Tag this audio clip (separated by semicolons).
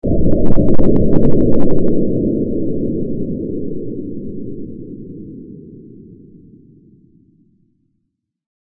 c4; detonation; explosive